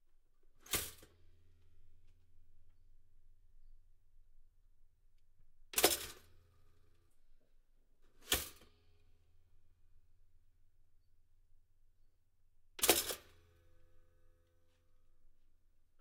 Couple of takes of the toaster.
Recorded on Zoom H6 with Rode NTG2.